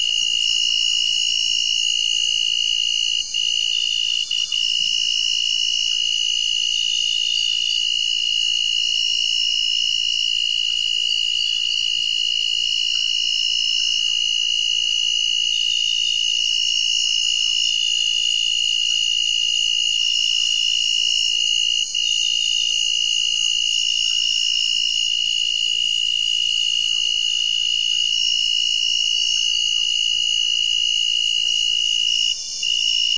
Tropical rainforest Amazonia,
Brazil, short after sunset, a single cicada on a tree, invisible but
very loud and lots of other noise making insects. Sony DAT-Recorder